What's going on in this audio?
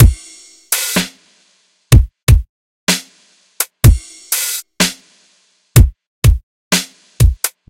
beat with snare 4 4 125bpm blobby type kick fizzy hats 3456-3467
beat with snare 4 4 125bpm blobby type kick fizzy hats
drum-loop, groovy, quantized, percs, loop, beat, drum, dance